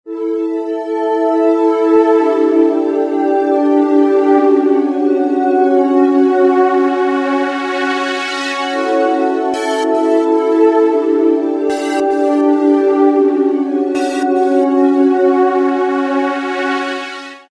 two Malstrom synths. one sweeping and another doing the bright pulsing tone on the 3rds.